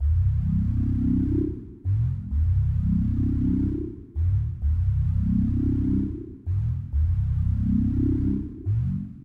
Horror theme/ Monster Breathing
Some weird breathing-type sound i came up with. Sound ominous.
Big, Creepy, Dark, Loop, Reverb